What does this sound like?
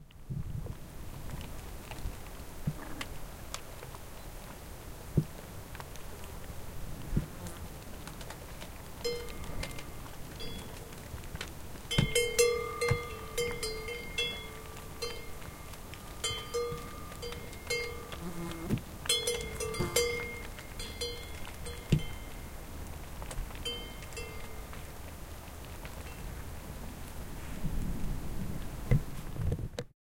Cloche de Vache et Mouches
Recorded during a hike in the Pyrénees in France (summer 2016).
Flies, Fieldrecording, Cowbell